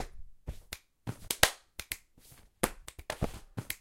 Clap Percussion Loop
I recorded myself snapping my fingers clapping, brushing my clothes, etc. and constructed from them this percussive loop. It can be used as an add-on loop to any track. Loops a 126 bpm.
cut, clap, percussive